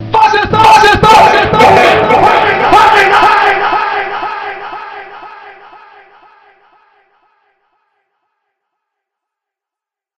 A clip from a recording of a band I'm playing in.Two guys shouting FASISTAR in icelandic.Mixed in Cubase with alot of delay.
angry, facist, loud, mad, screams, vocals